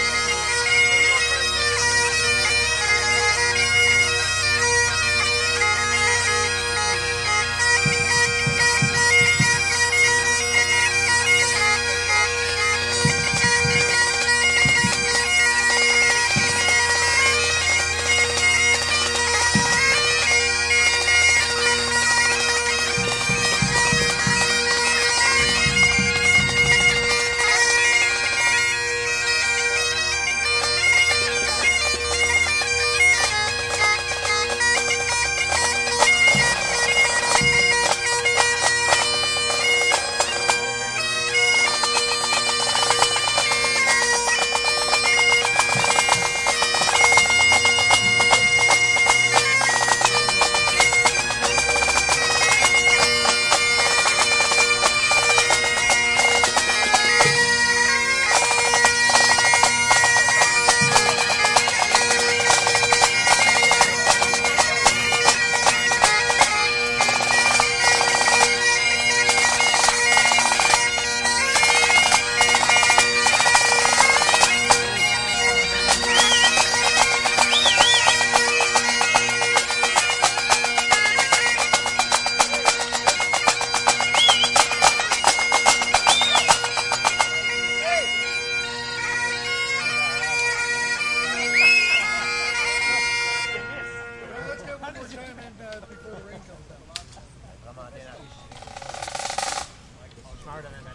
bagpipes street band5
bagpipes, band, street